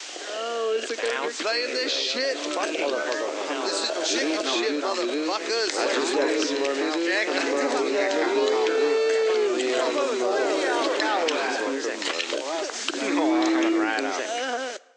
Party with Two People
A party ambiance track that consists mostly of just two people, with some guest stars.